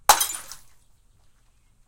Bottle Smash FF165
1 light quick beer bottle smash, hammer, liquid-filled, tinkle